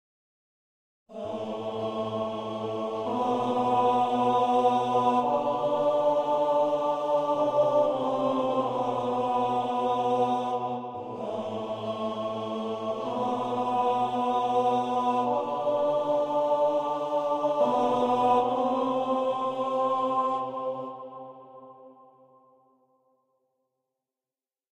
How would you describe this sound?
Men Choir
I Played a simple cadence phrase with choir sound. First Women, then added men tenor and men base, then together all in one pack. Done in Music studio.
cathedral, choir, men, Men-choir